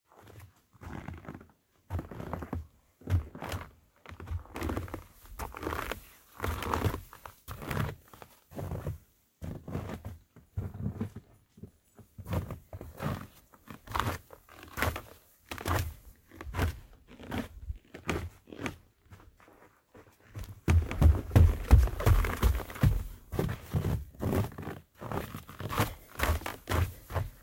Walking up and down wooden steps with snow on them at different speeds.